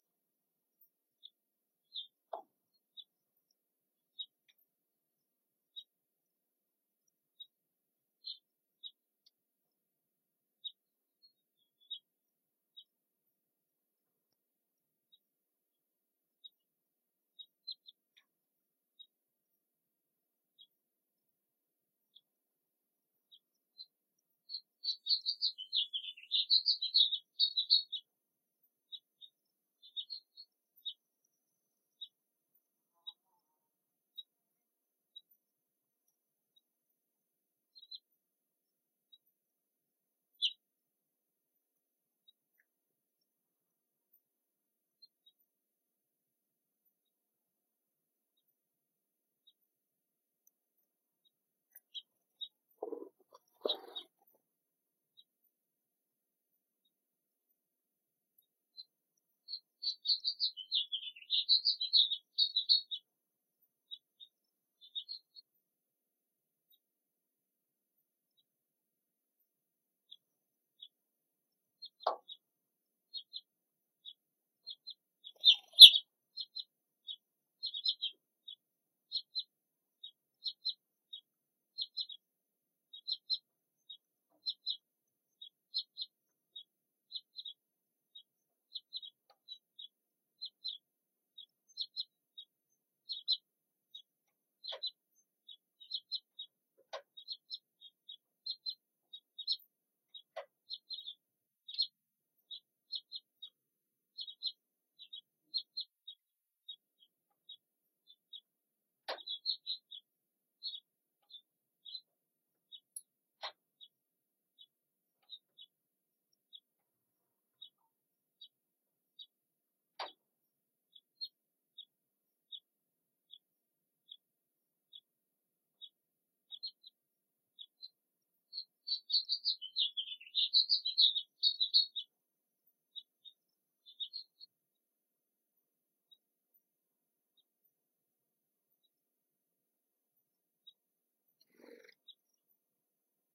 Sparse birdsong, evening, sagebrush plateau of northern New Mexico.
bird, birds, birdsong, field-recording, nature, wildlife